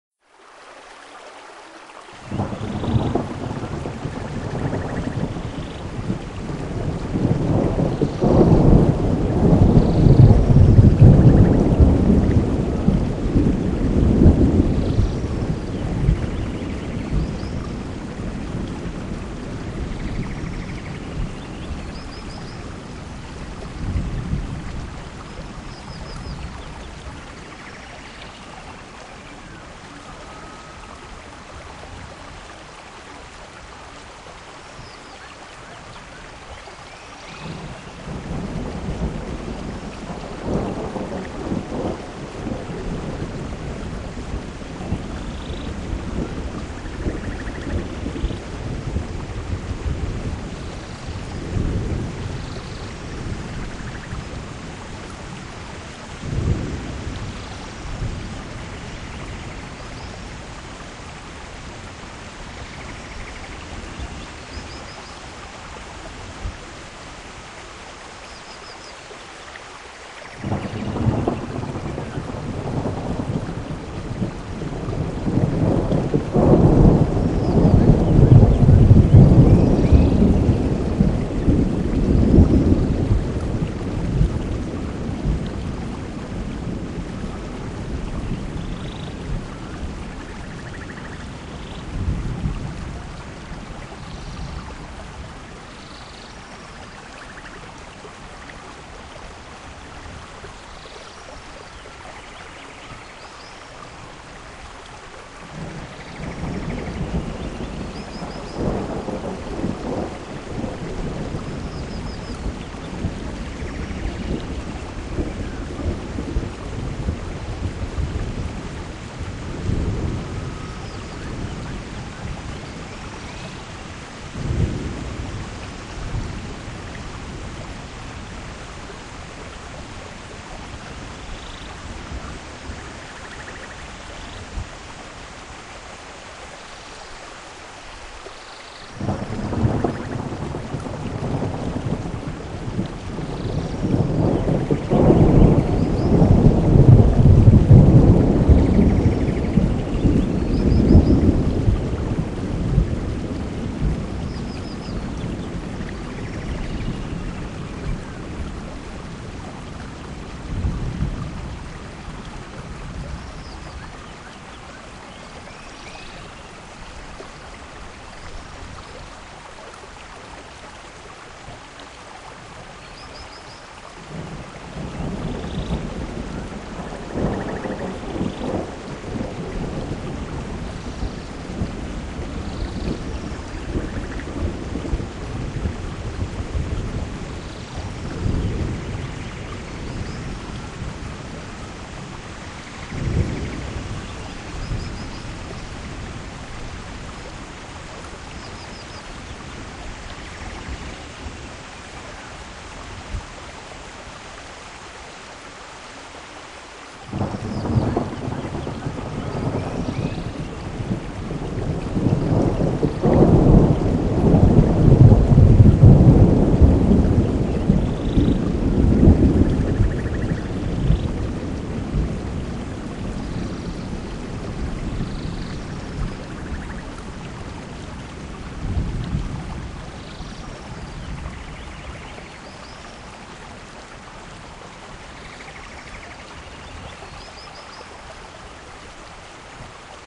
Rain Sound and Rainforest
the sound of a forest after rain / drops falling on ferns rear
a, after, ambience, ambient, AudioShare, dripping, drops, falling, ferns, field-recording, forest, nature, rain, raindrops, Rainforest, rear, sound, thunder, water